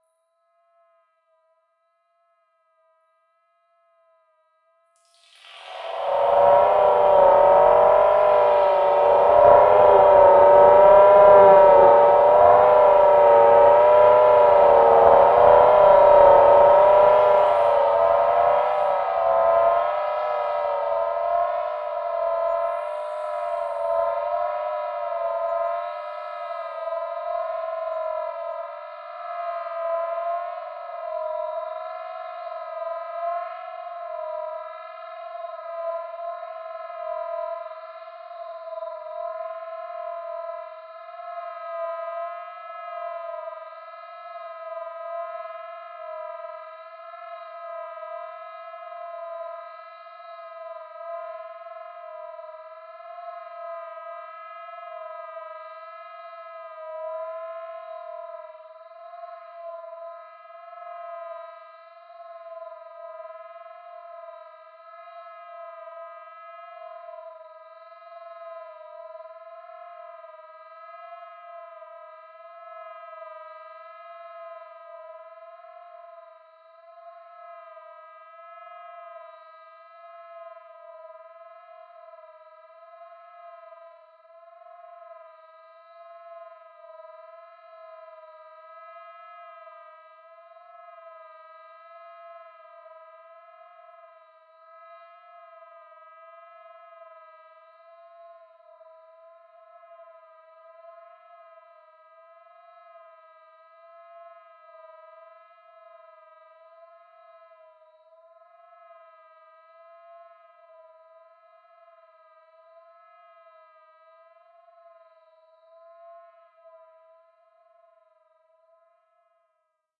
VIRAL FX 03 - C1 - SPACE SWEEPING FREQUENCIES with long delay fades
Slowly sweeping frequencies with a very slowly fading away delay. Created with RGC Z3TA+ VSTi within Cubase 5. The name of the key played on the keyboard is going from C1 till C6 and is in the name of the file.